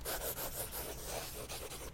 quick drawing sound effect